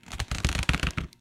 plastic squeak2
rubbing hand on an air filled plastic bag.
balloon, plastic, rub, squeak